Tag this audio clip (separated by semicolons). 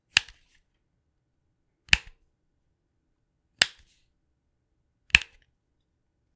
click; light; 9mm; military; action; G2C; switch; police; game; glock; light-switch; compact; pistol; firearm; gun; UI; weapon; war; Taurus; handgun; shooter; safety